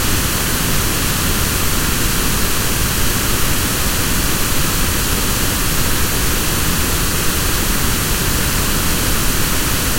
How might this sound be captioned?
PINK NOISE-10s
(Stereo) with 500 ms delay between channels; generated pink noise via Audition.